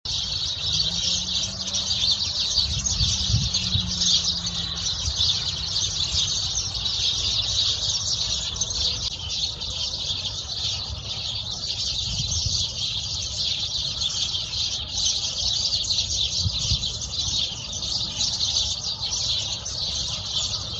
This is the birds we hear in shrubs every singing around 10 am in early December. The birds cannot be seen but fly out to our garden and then we see they are all Tree Sparrows.